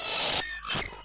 The starship enterprise has a virus.